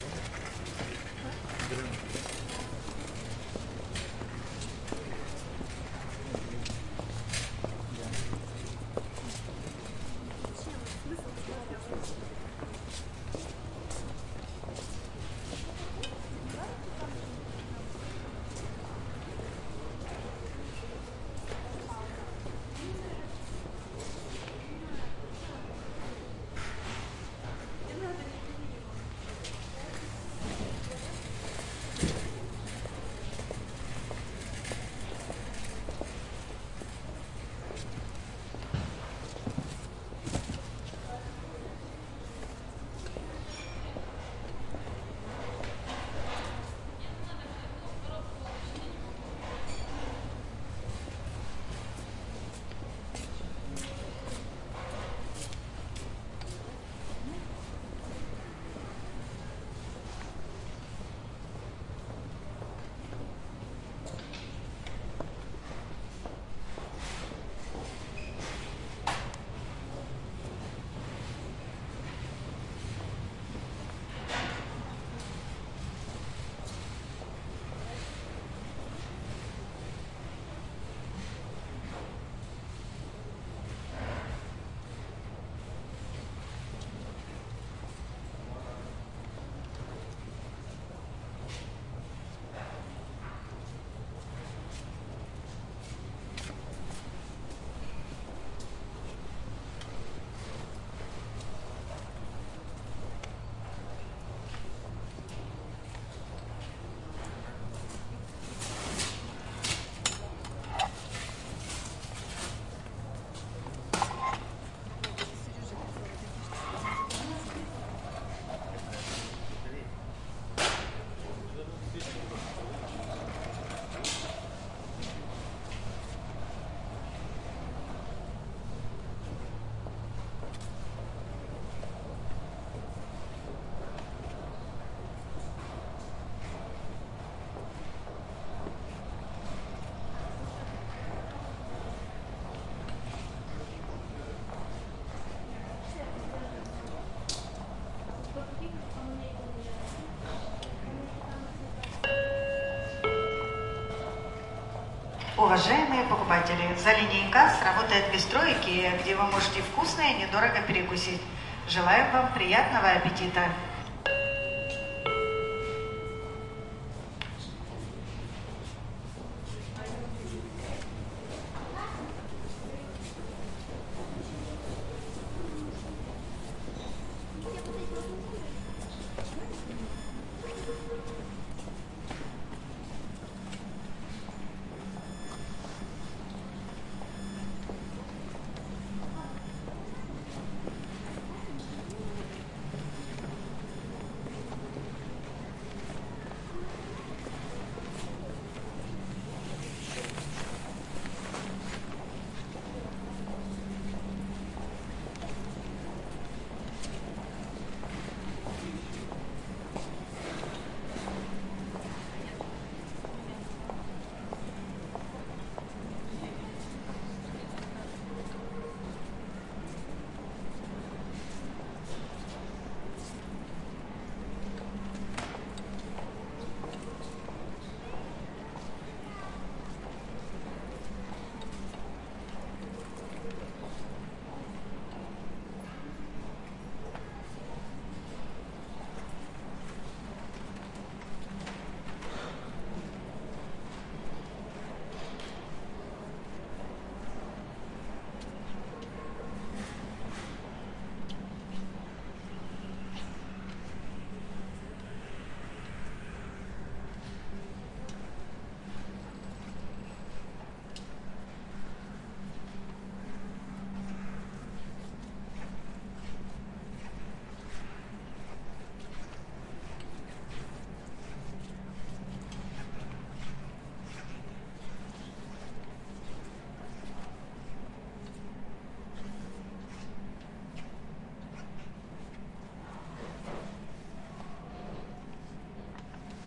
Atmosphere in the shop (Ikea) in the Omsk. Walking through the shop. Peoples talks.
-02:14 Audio announcing in Russian language about canteen.
Recorder: Tascam DR-40.
shop, omsk, supermarket, selling, atmosphere, buy, shopping, sell, ikea, money, buying, paying, pay, cash